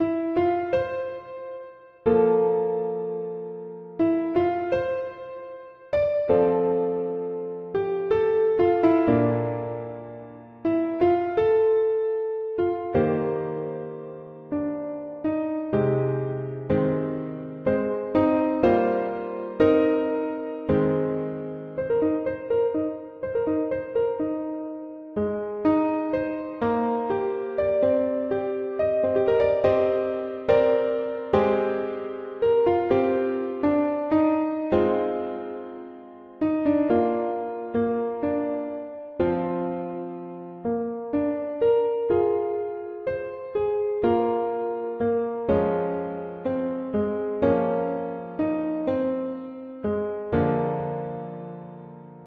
As I providing in this track, using fl key with piano roll, has not-officially-considered to be recorded sound.